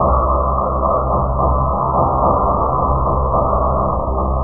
STM4 outro 2
Over processed and muted drum/synth loop.
drum, process, synth